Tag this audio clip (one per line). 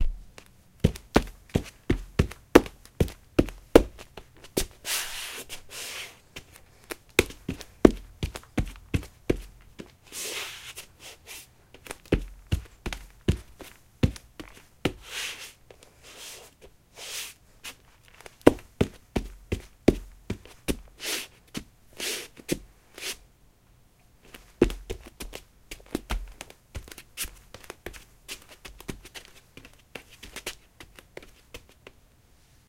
dancing
percussive
hard-surface
bare-feet
Feet